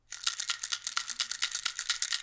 shaking a rattle